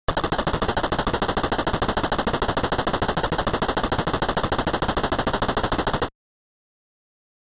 Text Scroll 3# 0 200
A harsh drum sound in the noise channel of Famitracker repeated continually to show text scrolling
retro, harsh, text, robotic, dialog, scroll, 8-bit, dialogue